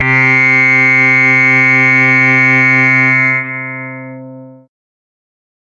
Alien Alarm: 110 BPM C2 note, strange sounding alarm. Absynth 5 sampled into Ableton, compression using PSP Compressor2 and PSP Warmer. Random presets, and very little other effects used, mostly so this sample can be re-sampled. Crazy sounds.
resonance, pad, trance, atmospheric, processed, dance, acid, dark, club, noise, rave, 110, synthesizer, sci-fi, house, hardcore, techno, electro, sound, effect, glitch-hop, synth, bpm, glitch, electronic, bounce, porn-core